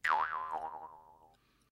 jaw harp6
Jaw harp sound
Recorded using an SM58, Tascam US-1641 and Logic Pro